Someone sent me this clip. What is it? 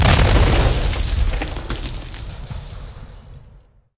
Grenade Fire Eplosion 01
Synthetic Sound Design, Created for an FPS shooter.
Credits: Sabian Hibbs Sound Designer
:Grenade Launcher FPS:
Action, effects, fire, Firearm, FX, Grenade, Gun, gunshot, Launcher, SFX